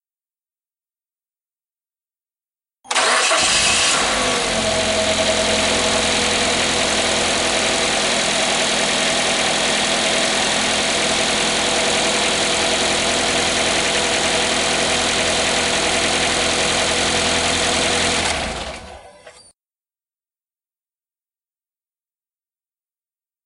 Encendido de auto